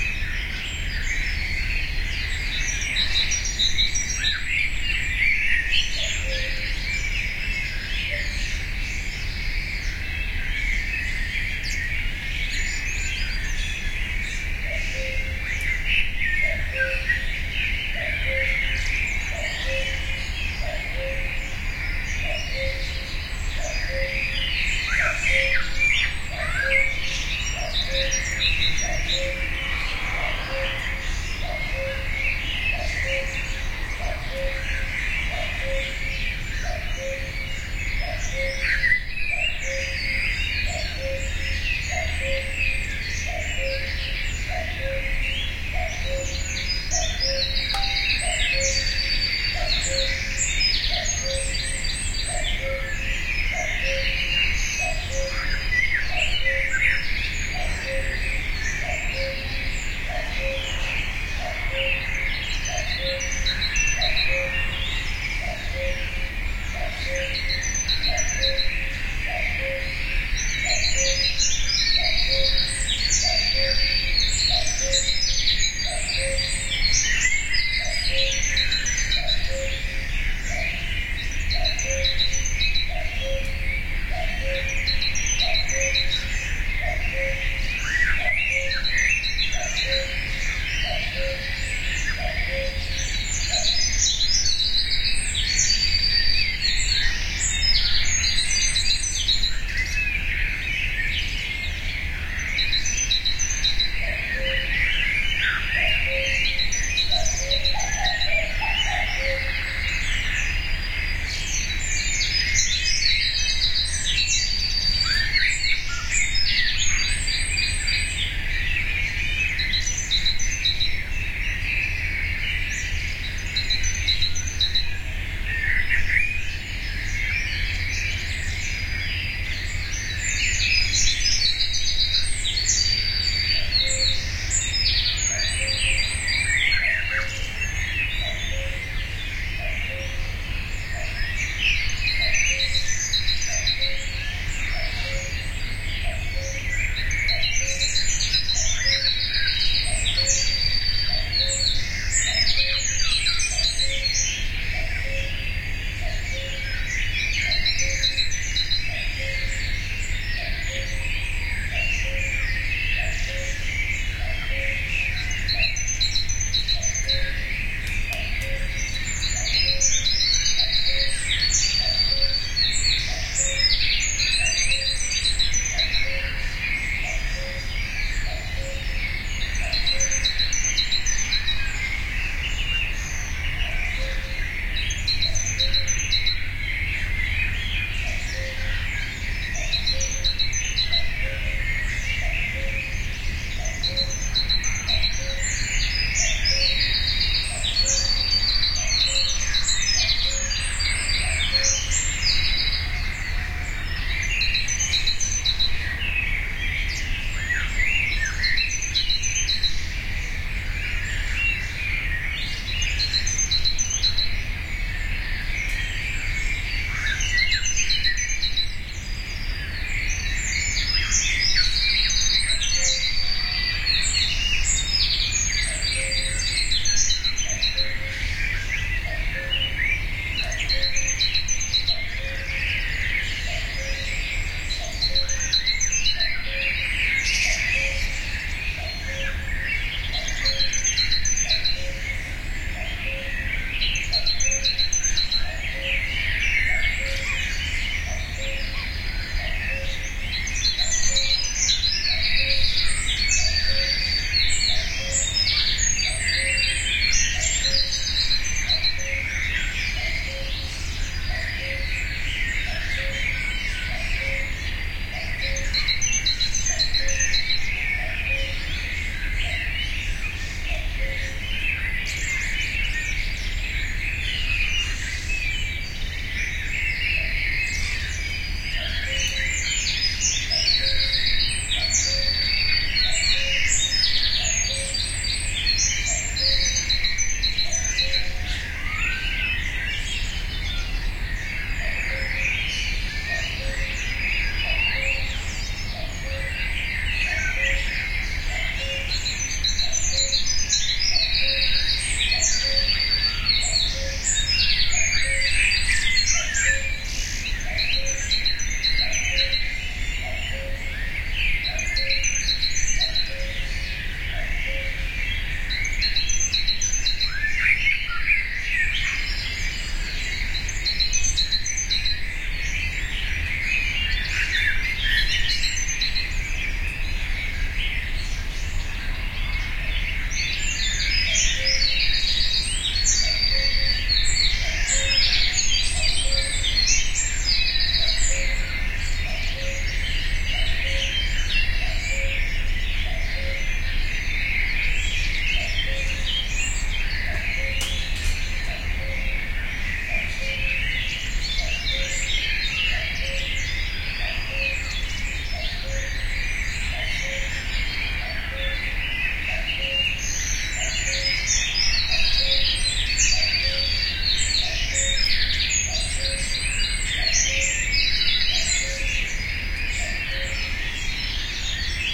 dawnchorus with cuckoo
This is part of a longer recording, I started at 4.20 am at the end of May 2009 on the outskirts of Esbjerg in Denmark. Cuckoo time, like every spring!
That's what I call a strong dawn-chorus!
AT3031 microphones, FP-24 preamp into R-09HR.
denmark, field-recording, cuckoo, birdsong, dawnchorus